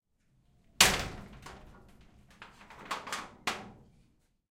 Metal Shed Door Close 1
The sound of the door of a small metal shed being closed.
Recorded using a Zoom H6 XY module.
shed, close, metal, latch, loud, door, closing, impact